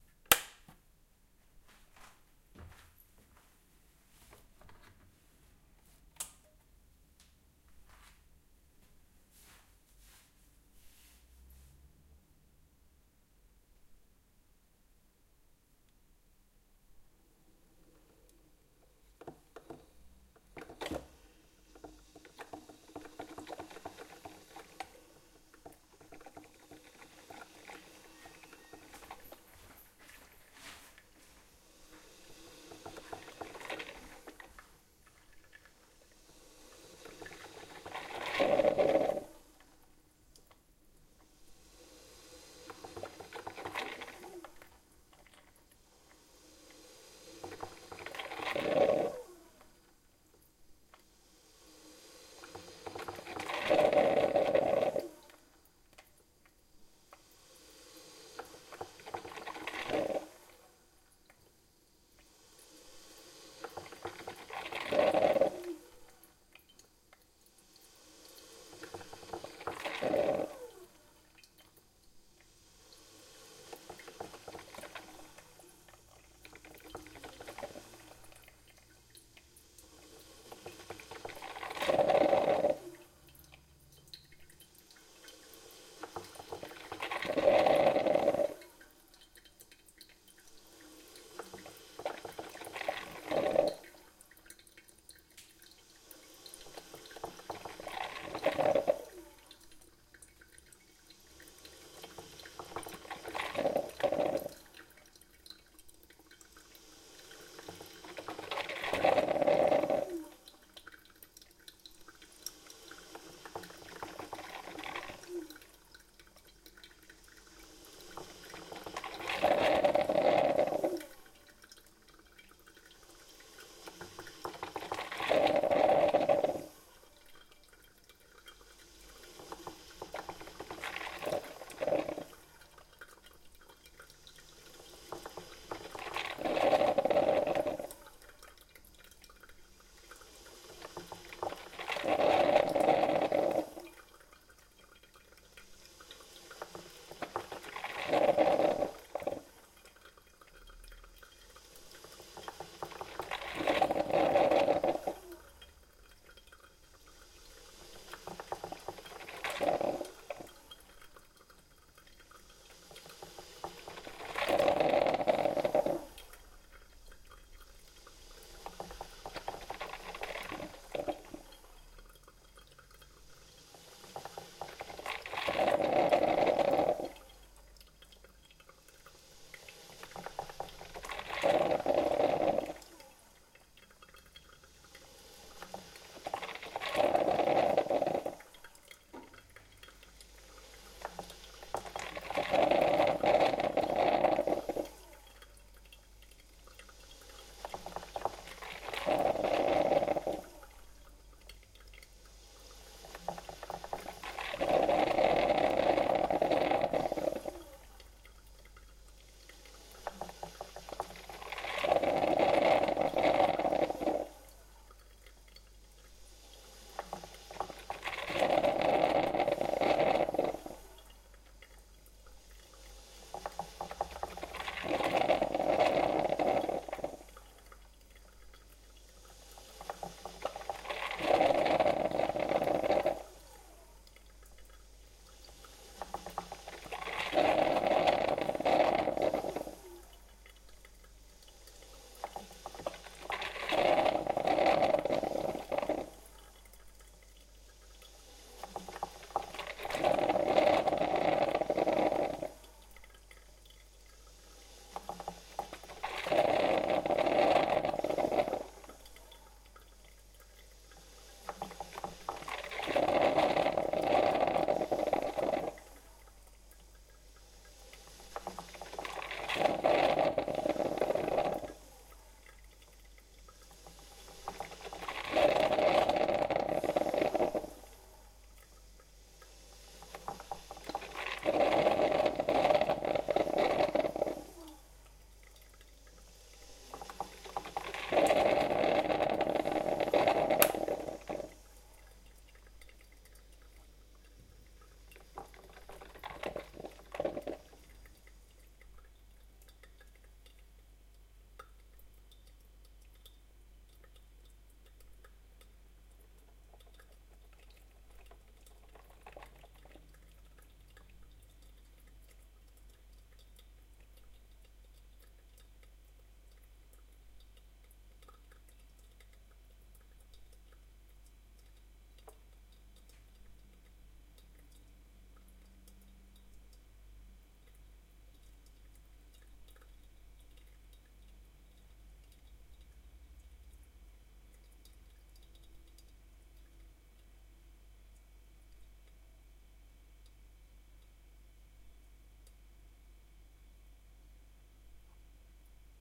Old Coffee Maker
Old 90's Polish Zelmer coffee maker with filters recorded with Zoom H4n.
coffee-maker, strange, zelmer, polish, h4n, appliance, household, sound, poland, coffee, Hot, home-appliance, water, cup, zoom-h4n, abstract, home, electric, machine